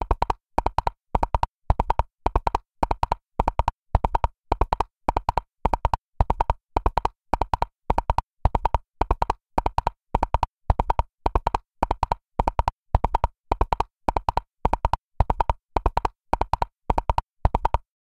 Horse galloping (coconut shells) version 1
Simple recording of coconut shells made to sound similar to that of a horse galloping
Horse, Gallop, Galloping